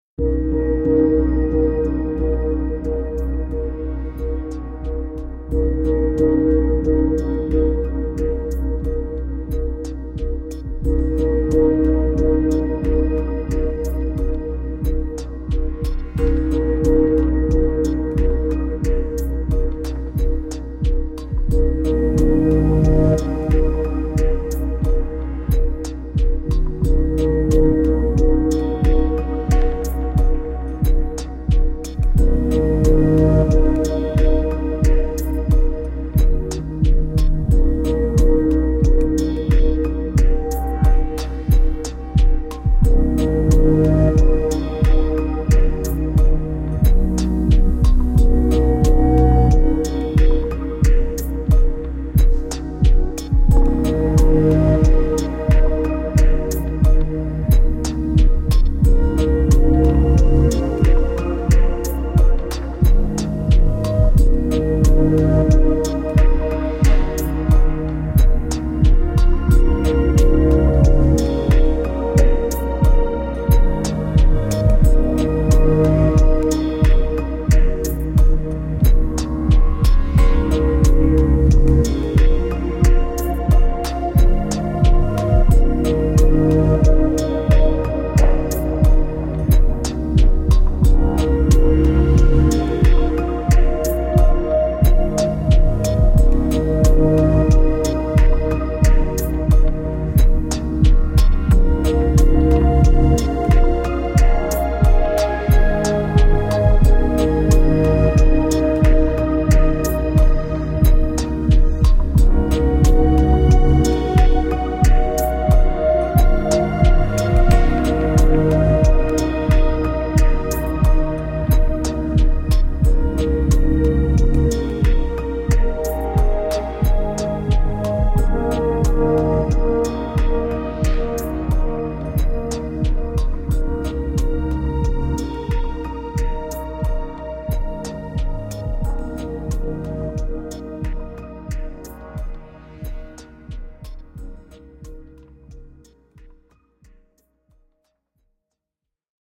filteredpiano remix
Added some subtle groove elements and synth pads.